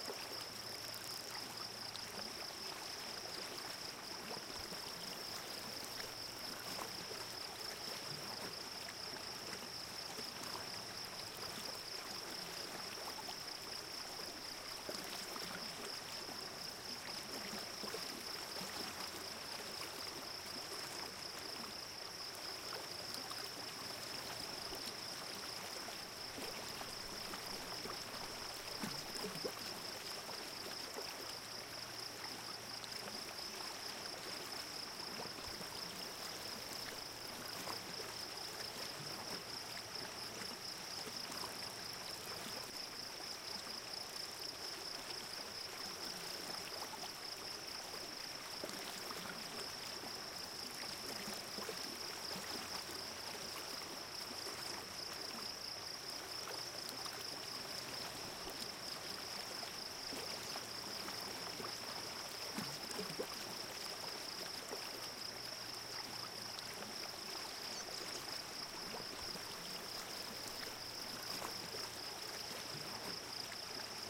Stream with Crickets
A loopable soundscape of a stream running through a field, rich with the sound of crickets. Made from sounds recorded in Boulder, CO.